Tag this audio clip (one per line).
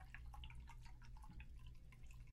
efect
water
river